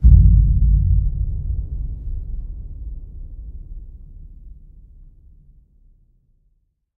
massive metal hit
Hitting the side of a water tank, recorded from the inside.
bass, big, boom, cinematic, dark, fx, hit, huge, impact, massive, metal, reverb, sound-effect, space, spooky, suspense